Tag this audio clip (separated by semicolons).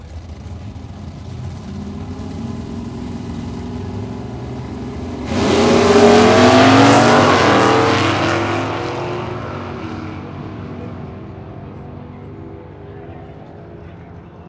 Drag-Racing; Engine; Race